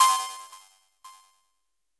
Delayed melodic mallet highpassed 115 bpm C5
This sample is part of the "K5005 multisample 03 Delayed melodic mallet highpassed 115 bpm"
sample pack. It is a multisample to import into your favorite sampler.
It is a short electronic sound with some delay on it at 115 bpm.
The sound is a little overdriven and consists mainly of higher
frequencies. In the sample pack there are 16 samples evenly spread
across 5 octaves (C1 till C6). The note in the sample name (C, E or G#)
does indicate the pitch of the sound. The sound was created with the
K5005 ensemble from the user library of Reaktor. After that normalizing and fades were applied within Cubase SX.